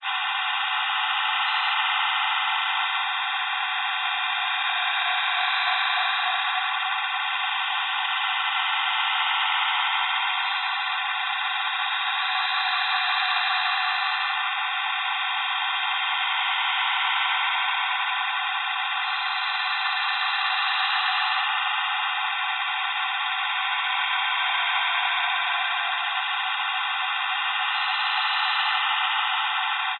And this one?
Created with coagula from original and manipulated bmp files. Life support system of the USS FreeJustin.
image, life, space, support, synth